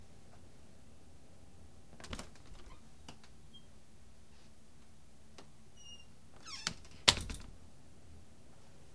hollow wood door open then close
this is my bedroom door opening and then closeting. it was recorded with a sennheiser e835 dynamic microphone, and a behringer tube ultragain mic100 preamp.
close-door, door, wood, wood-door